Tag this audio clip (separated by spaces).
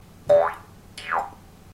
bounce cartoon jews-harp jump platformer